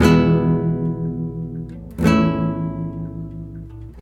Guitarra acústica 1 (Acoustic guitar)
Una guitarra acústica tocando las notas Mi y La. Grabada con una Zoom H6.
An acoustic guitar playing the E and A notes. Recorded with a Zoom H6.
acoustic, guitar, guitar-chords, chords, nylon-guitar, acordes, guitarra, acustica